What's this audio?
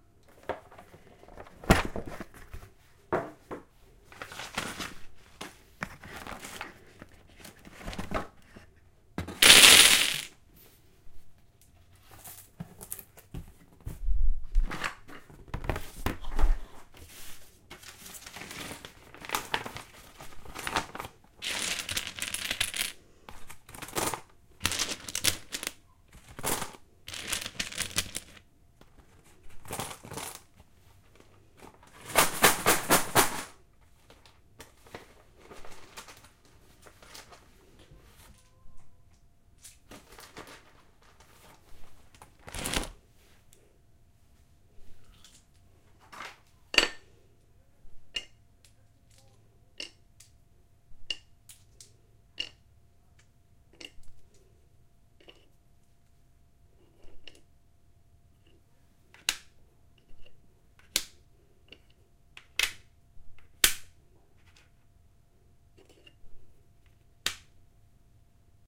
Opening a Scrabble box, dealing with the wooden tiles.